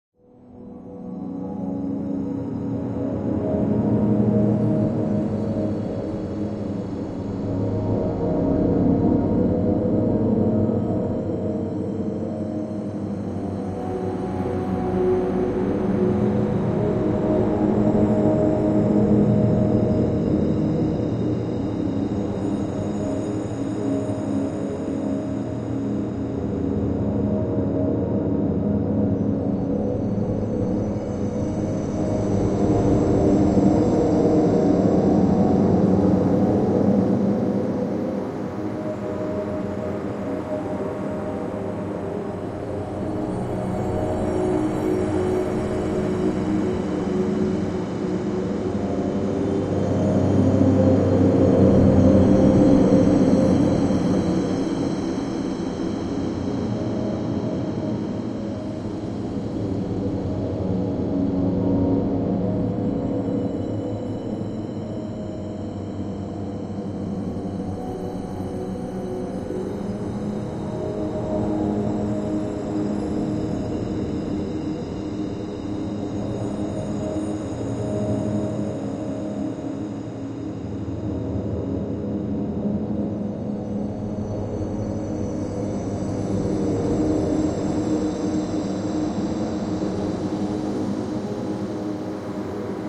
Meditate Calm Scape
Amb, Strange, Ambient, Calm, Cinematic, Meditate, Wind, Scape, Scary, Drone, Sci-Fi, Movie, Environment, Spooky, Atmosphere, Relax, Sound-Design, Creepy, Ambience, Ambiance, Chill, Fantasy, Sound, Horror, Eerie